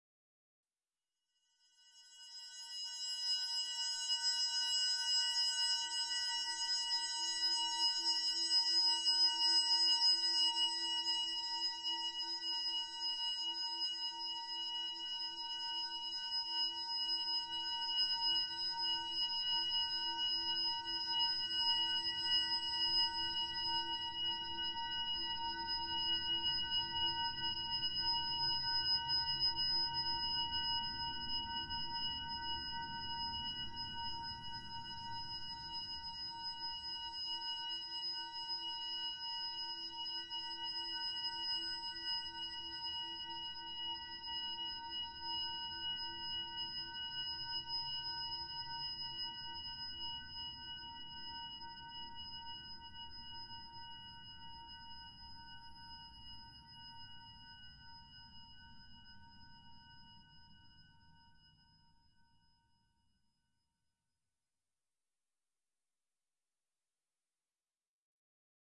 Sword Drone
SFX created with the Paul Stretch software from a sword sound.